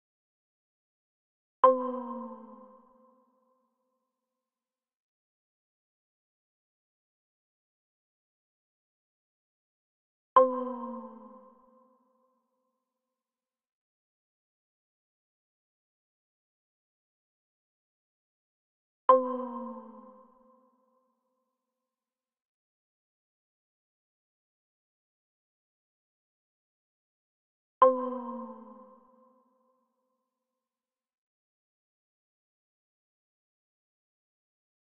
Synth Bend, 110 BPM